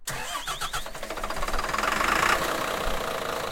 bus engine start outside
Bus engine starting
bus, engine, exterior, start, transportation, vehicle